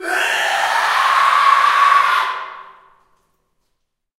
Male Scream 6
Male screaming in a reverberant hall.
Recorded with:
Zoom H4n
agony, cry, dungeon, fear, hoes, human, male, pain, reverb, schrill, screak, scream, screech, shriek, squall, squeal, steven, torment, yell